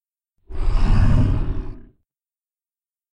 Made by me saying "Roar" into the mic twice in a growly voice and lowering to pitches one deeper than the other. Edited with Audacity.